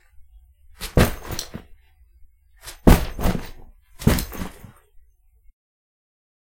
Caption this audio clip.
Can be used as a body or any heavy item that is not metel or anything hard.